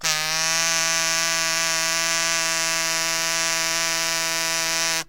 Multisamples of a green plastic kazoo in front of a cheap Radio Shack clipon condenser. Load into your sampler and kazoo the night away! E note.